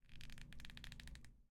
38 Tensar Arco
setting up the shot
arrow, bow, prepare